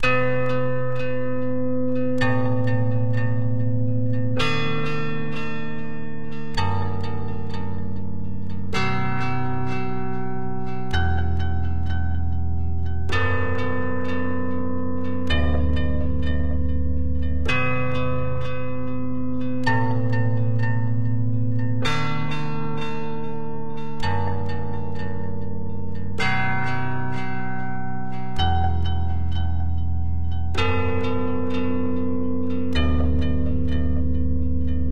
Ableton guitar loop2